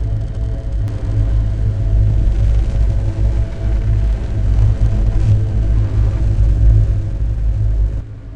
dark rising and falling pitched pad combined with a bit of hiss toward
the end of the loop; 2 bars; made with Native Instruments Reaktor and Adobe Audition
sustained, electronic, pad, 2-bar, industrial, noise, sound-design, loop, hiss, ambient